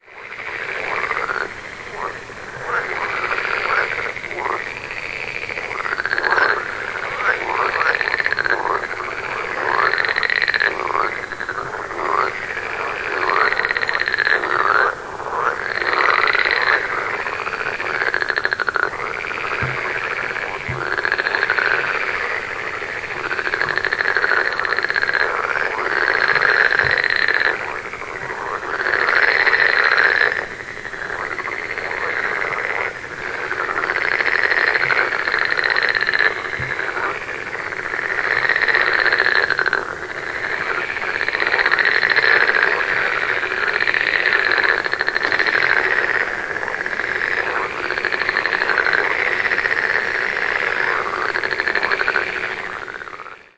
Frogs croak at a pond. The recording was made louder, so there's a bit noise on it. Please turn it down for your purposes again. Recorded with a FlashMic.